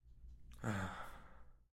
someone hugging something
love, romantic, hug